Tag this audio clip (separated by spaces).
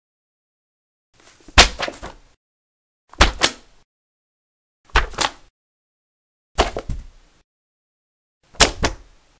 paper book thud carpet drop